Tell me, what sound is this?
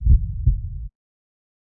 74829 jobro HeartbeatII
Heart Beat by Jobro edited(noise reduction, normalize etc)
beat, by, edited, heart, jobro